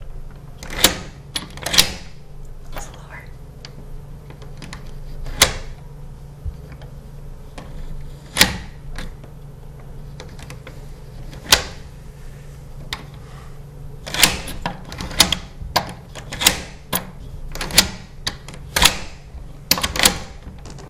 lock:unlock
locking and unlocking a door
metal, unlock, door, lock